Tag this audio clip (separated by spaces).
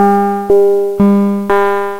440-hz image2wav note tone